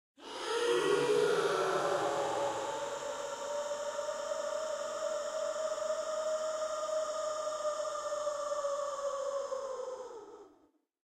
breath grp shk1 tmty1
Just some examples of processed breaths form pack "whispers, breath, wind". This is a granular timestretched version of the breath_group_shocked1 sample.
air, granular, noise, tension, wind, shocked, shock, suspense, breath, processed